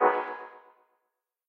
Button Deny Spacey
Button Spacey thing with a deny feel - monotone
deny, button, fi, sci